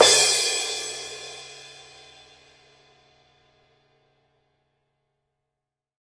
Sabian Cymbal Hit
One hit of my Sabian cymbal.
cymbal, sabian, cymbals, percussion, sample, drums, one-shot